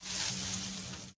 Sonido realizado para el final de la materia Audio 1, creado con foley, editado con reaper y grabado con Lg Magna c90